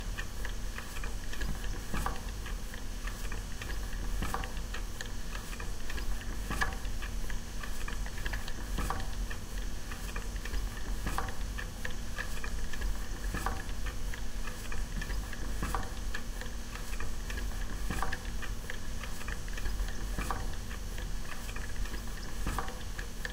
gas meter
a gas meter in a corridor next to a boiler room (family house)
mechanical sound
periodic clacking sound
quiet noise of running gas boiler in background
recorded from approx. 4cm
recorded with:
built-in mics (collinear position)